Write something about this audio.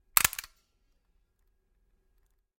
Stapler Hands 02
Collection of sounds from a stapler. Some could be used as gun handling sounds. Recorded by a MXL V67 through a MOTU 828 mkII to Reaper.
click, staple, slide, cock, tick, hit, gun, stapler, clip, thud